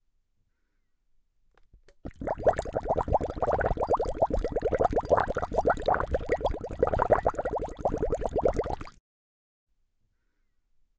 14-1 Bubbles close
Bubbles in water
CZ, Czech, Panska, bubbles, glass, water